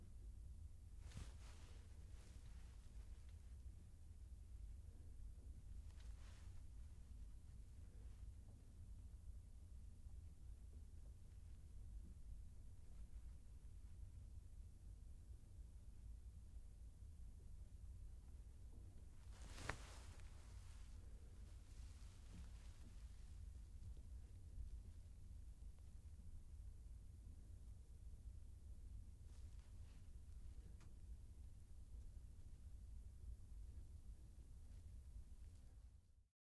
Someone's jacket shifting as they move.